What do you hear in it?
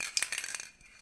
Queneau Bombe Peinture21
prise de son fait au couple ORTF de bombe de painture, bille qui tourne
boal, spraycan, spray, aerosol, painting